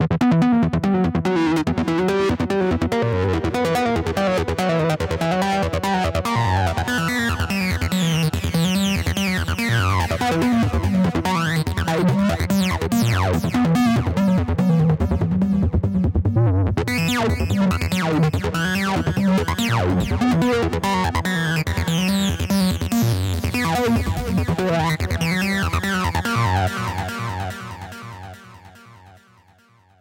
An acidic psy trance melody that I created =). I used synth1 to make the lead.